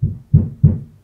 Three thumps on a wall or ceiling, as if trying to get attention though the wall or floor.
Recorded for use on stage as an "answering thump" from a neighbour in a block of flats.
Recorded on a Zoom iQ7, then mixed to mono.